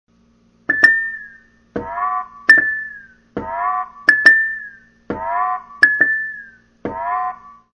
A short clip from my Roland kit. Thanks. :^)